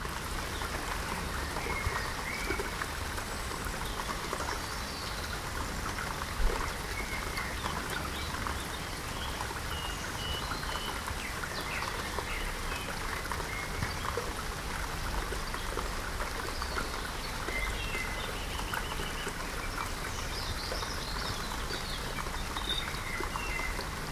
Rainy woods ambience recorded in England.
Forest, Rain, Woods